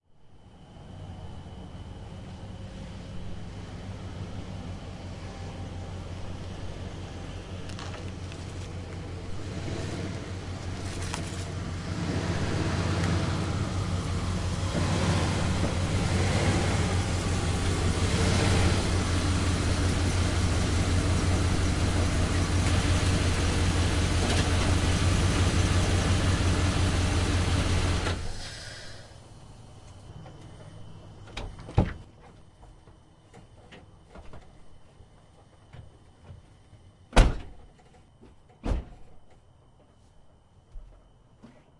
2001 Dodge 1500 pickup truck. Magnum V8 engine. Drives towards recorder. Recorded with Zoom H4
(it says "no horn" because this was part of a theatre sound design, and I had another cue that I built a horn into.. this was the original sans horn)